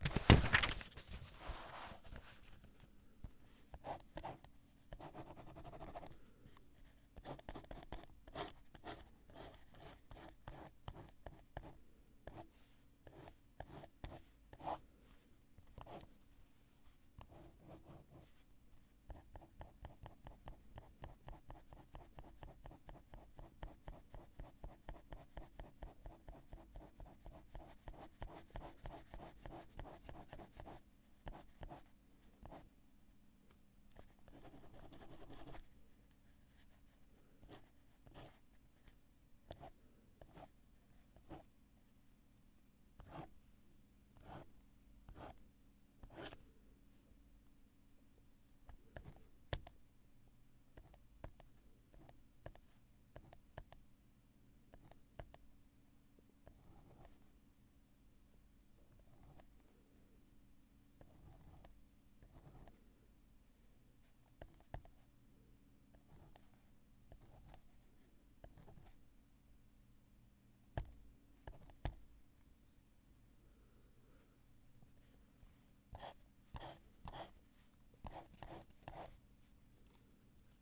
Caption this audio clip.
Writing and scratching around with a pen on a refill pad. Recorded with a Neumann KMi 84 and a Fostex FR2.